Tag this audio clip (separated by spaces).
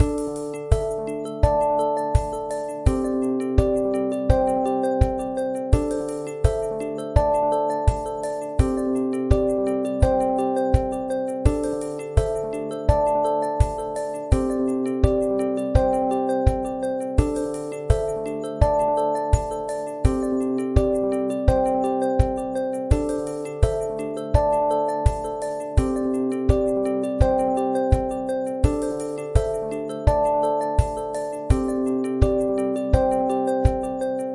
loop techno electronic synth 120bpm pianosynth